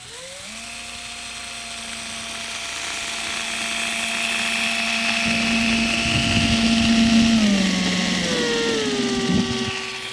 Drill getting closer
mechanical, buzz, machine, latch, environmental-sounds-research, whir